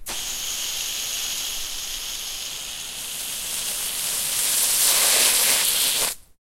Spraying water from a garden hose
Spraying some water from a garden hose on a quiet evening.
garden, hose, spraying, water